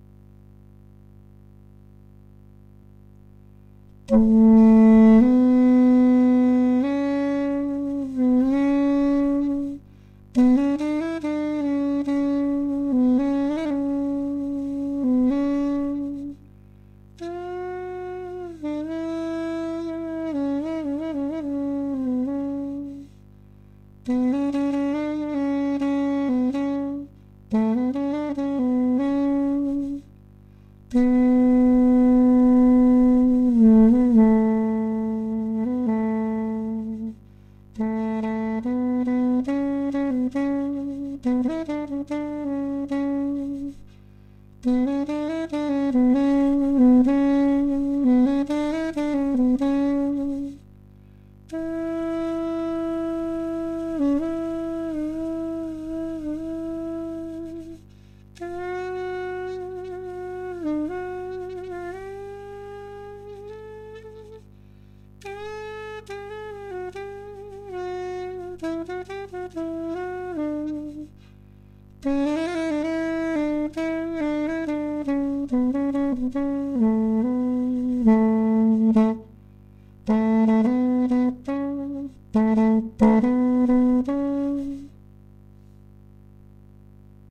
Teak saxophone sounds like Duduk
Ethnic teak wooden saxophone. Sounds like Duduk.
ethno, sax, bamboo, Duduk, saxophone, wooden